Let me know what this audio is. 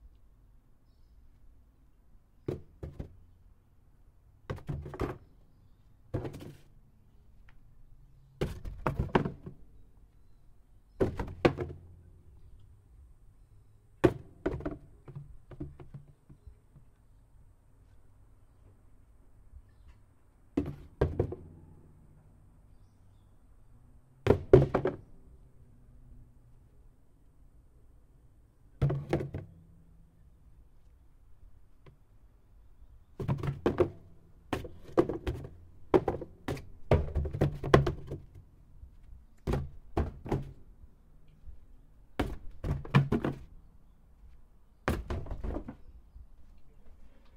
BOXES FALLING
Various instances of a box dropping and rolling a short distance.
created by needle media/A. Fitzwater 2017